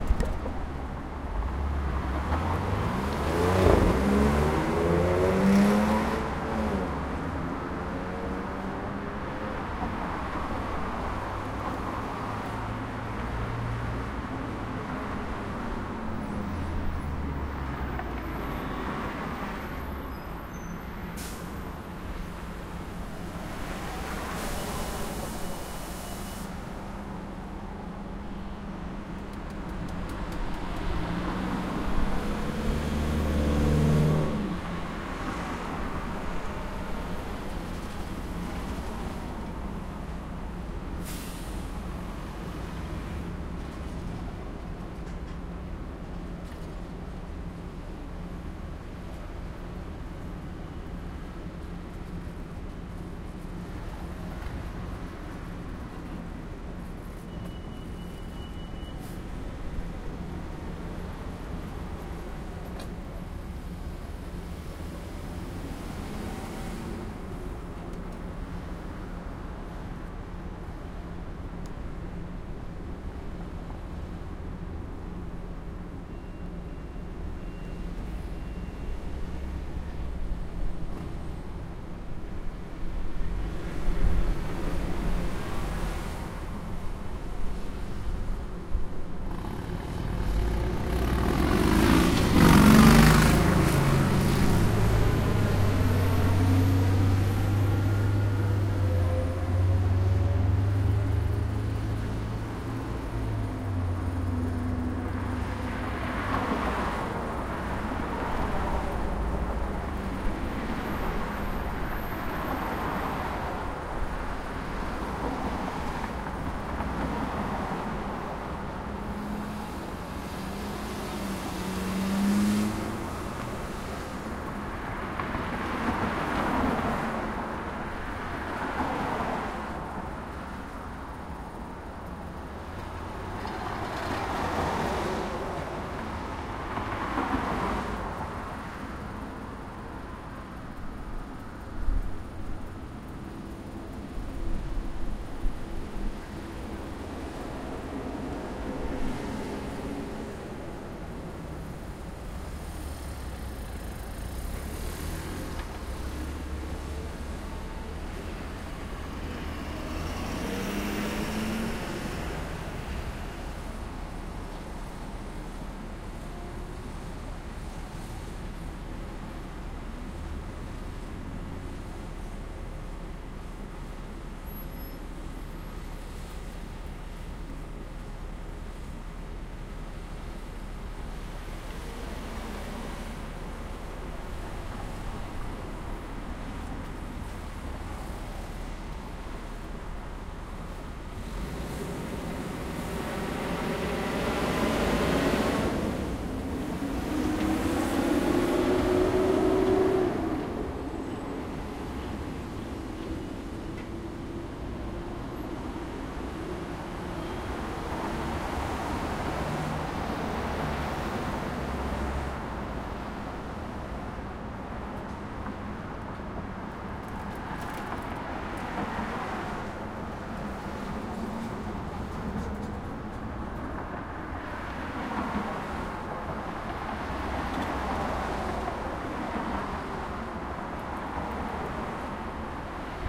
Hillcrest Afternoon
A day in the small town of Hillcrest, CA, with traffic and people talking.
people, streets